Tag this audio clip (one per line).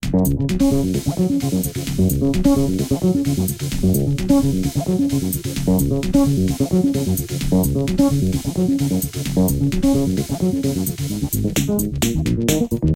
audio-library
background-music
download-background-music
download-free-music
download-music
electronic-music
free-music
free-music-download
free-music-to-use
free-vlogging-music
loops
music
music-for-videos
music-for-vlog
music-loops
prism
sbt
syntheticbiocybertechnology
vlog
vlogger-music
vlogging-music
vlog-music